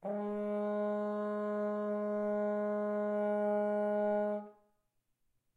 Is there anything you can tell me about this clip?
horn tone Ab3
A sustained Ab3 played at a medium volume on the horn. May be useful to build background chords. Recorded with a Zoom h4n placed about a metre behind the bell.
horn, note, a-flat, french-horn, ab, a-flat3, tone, ab3